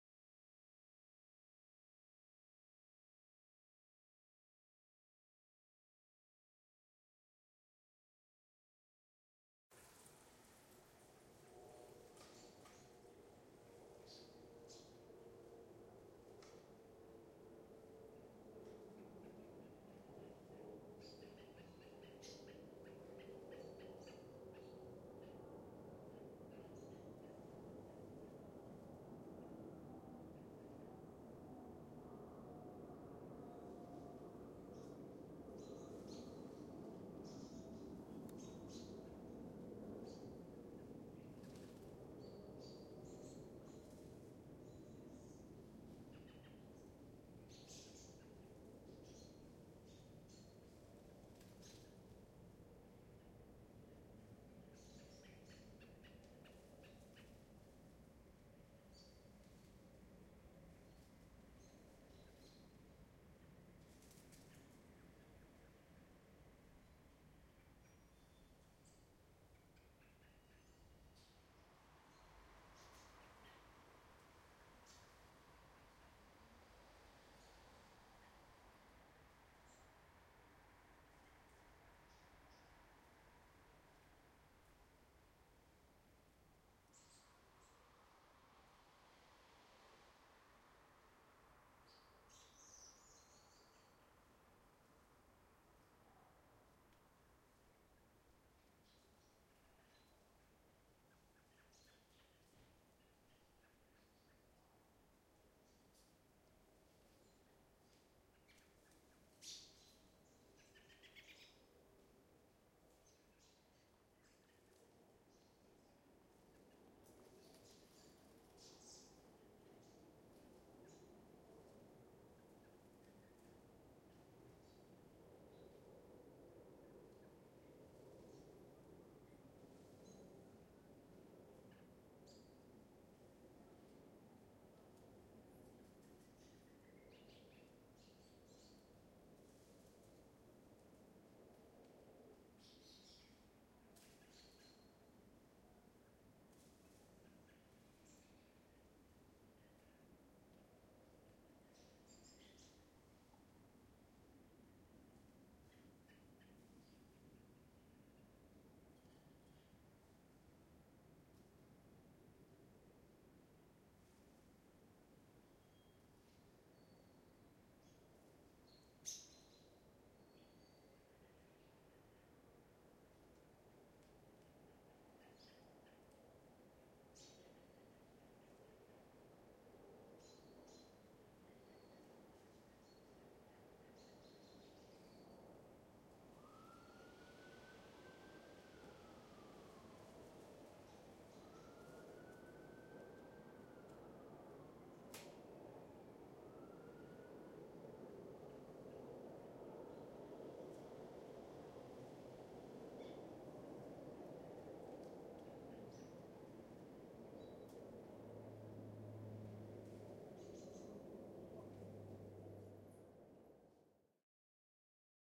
Night Ambience (ch 3/4) R
Night ambience in a major urban city. Ch 3/4 of a 4 channel recording. Nothing fancy.